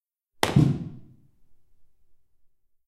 Switching Off PA
Medium theatre in Prague. Switching Off the P.A. system. Recorded with two mics.
off, switching, system